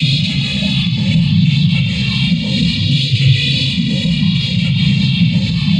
FTZ GC 119 FlyingAttack
Intended for game creation: sounds of bigger and smaller spaceships and other sounds very common in airless space.
How I made them:
Rubbing different things on different surfaces in front of 2 x AKG C1000S, then processing them with the free Kjearhus plugins and some guitaramp simulators.
Space, Aliens, Spaceship, Game-Creation, Phaser, Outer, Warp, Hyperdrive